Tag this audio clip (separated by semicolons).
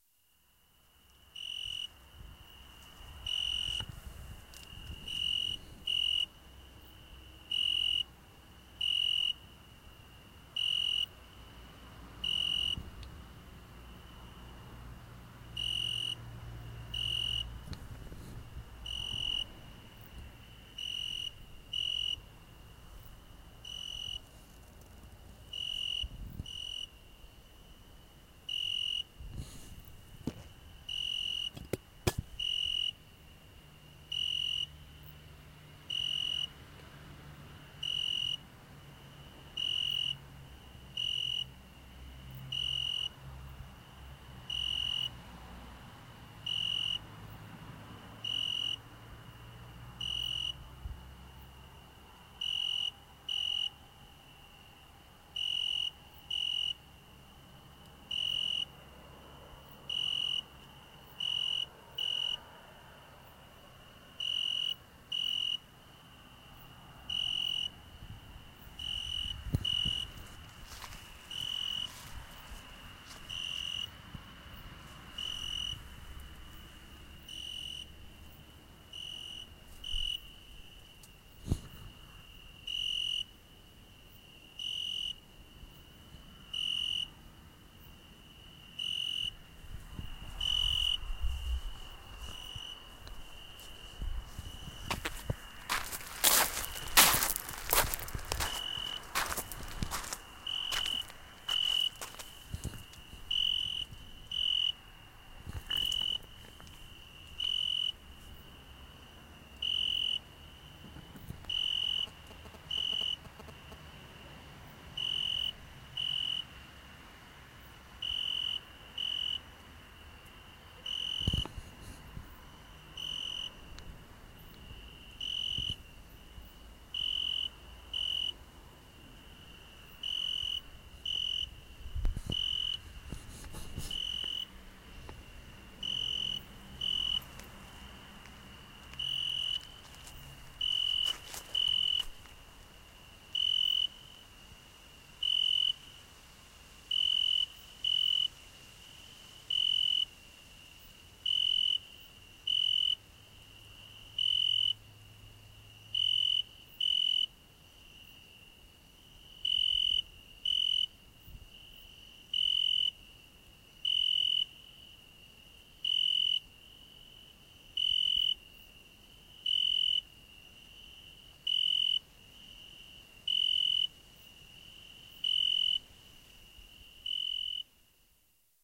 country; cricket; field-recording; italy; night; senigallia; summer; summer-night